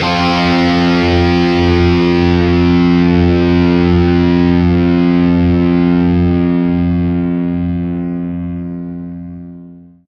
F3 Power Chord Open
Melodic, Distortion, Electric-Guitar